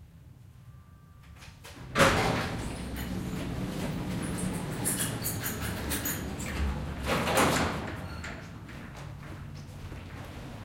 Goods lift door closing
lift, doors, opening, door, goods-lift